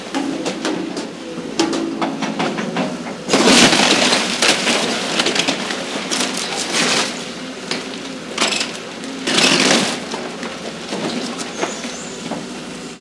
(2 of 3) A two-story wooden house being torn down by large industrial equipment. Recorded on a mini-DV camcorder with an external Sennheiser MKE 300 directional electret condenser mic.